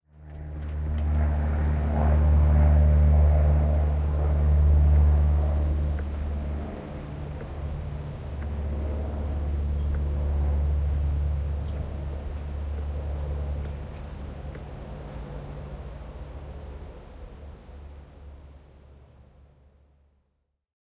Sound of small airplane in the sky in South of France. Sound recorded with a ZOOM H4N Pro and a Rycote Mini Wind Screen.
Son d’un petit avion dans le ciel du sud de la France. Son enregistré avec un ZOOM H4N Pro et une bonnette Rycote Mini Wind Screen.
plane, flight, airplane, aviation, aircraft, sky, jet-engine, flying, jet, aeroplane